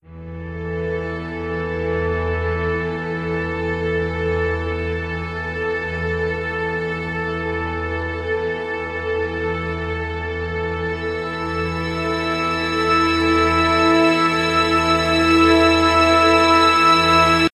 Suspense Strings (Cinematic)
I created this track in GarageBand using cinematic strings (Violins).
The strings build up to some kind of reveal/climax. 18 seconds in length. Thanks.
Bulent Ozdemir